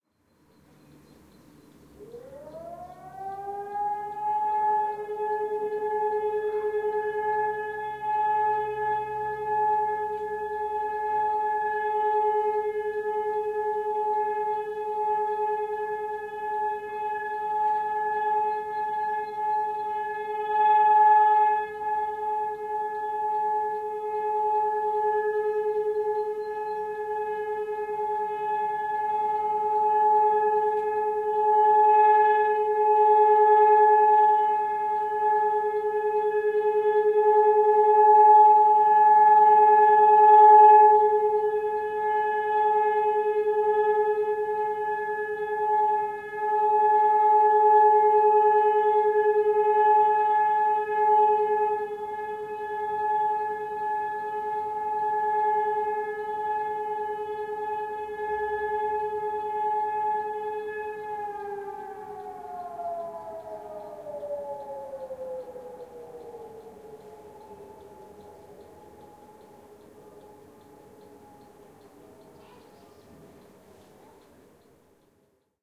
All-clear signal during a civil alert siren test in Cologne, Germany. Zoom H4n